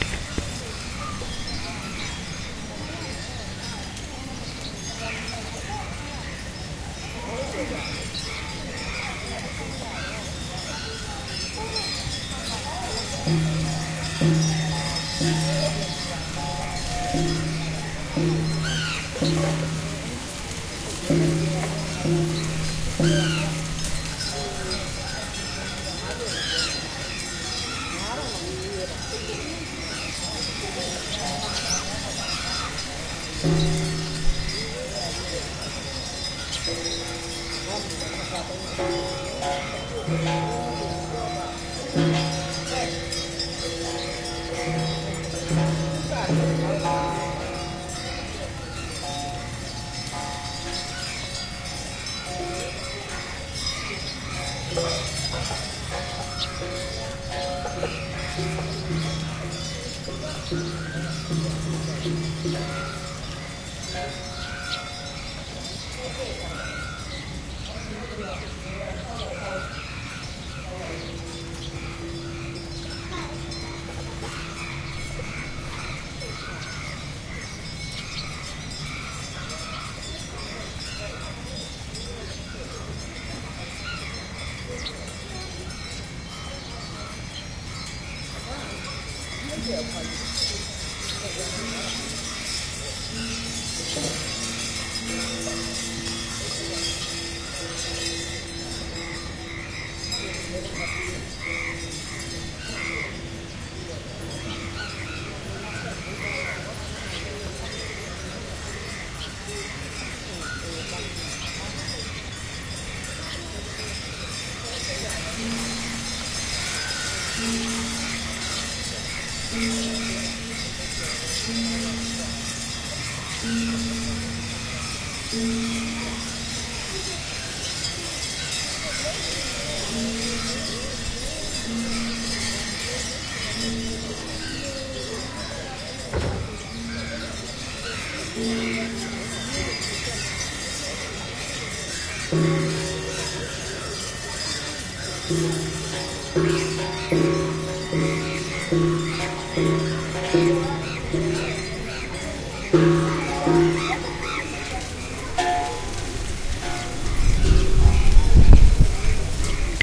Crows Bells voices in Bkg Schwedagon
Ambient recording at Shwedagon Pagoda in Rangoon
compound, voices, bells, temple, pagoda, ambient, Shwedagon